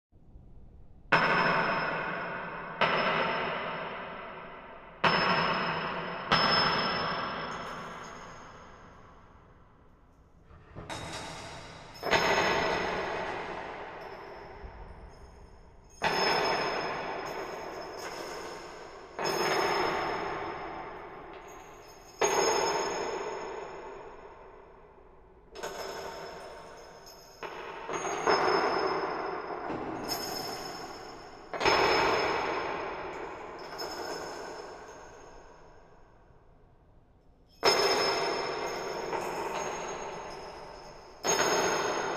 19 Metal falling; screws falling; distant; reverb; dverb
Screws falling with Dverb added for a more dramatic and atmospheric feel